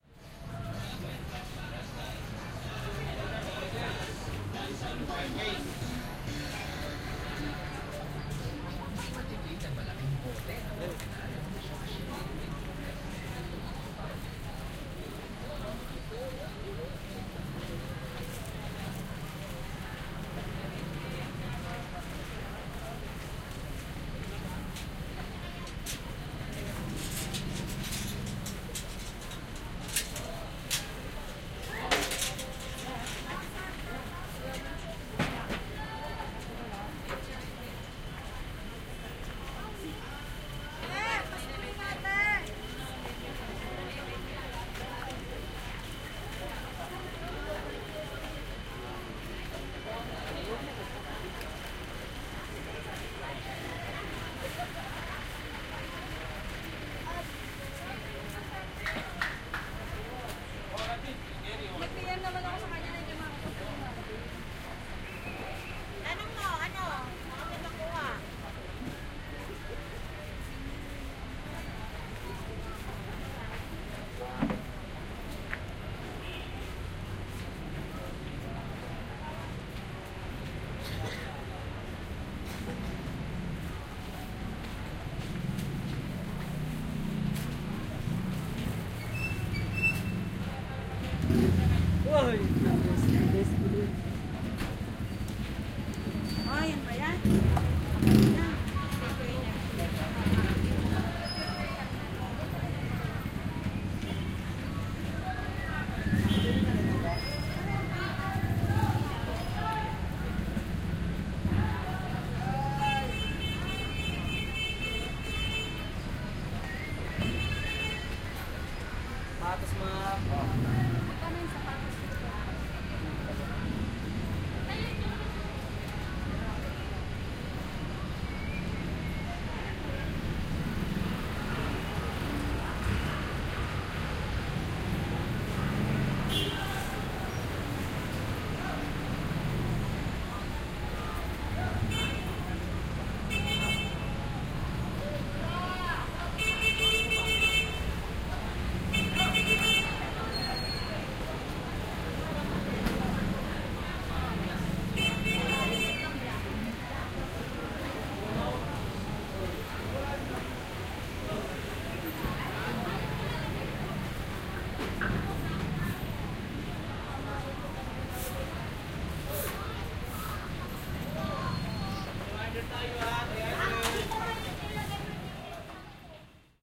LS 34151 PH ManilaWalk
Walking in Manila, Philippines. (binaural, please use headset for 3D effect).
This binaural audio file is one of the recordings I made in Manila a few days before Christmas 2018, while I was rambling in the shops and in the crowded streets of Divisoria and Baklaran, which are two places where you can find many sellers and vendors who sell everything you’d like to buy...
Here, the record starts inside a shop, and I’m going out to walk in the street.
Recorded in December 2018 with an Olympus LS-3 and Soundman OKM I binaural microphones (version 2018).
Fade in/out and high pass filter at 80Hz -6dB/oct applied in Audacity.
ambience, atmosphere, Baklaran, binaural, buyers, car, city, crowd, Divisoria, engines, field-recording, horns, jeep, jeepney, mall, Manila, motorcycle, noise, people, Philippines, sellers, shops, soundscape, street, streets, talking, town, vehicles, vendors, voices